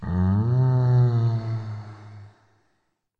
My own groan I use to add effect in music mixes - slight speed reduction added via Audacity